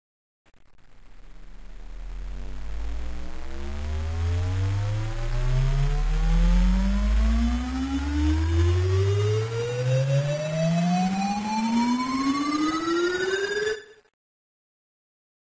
I was playing a bit in LMMS and I came up with a few risers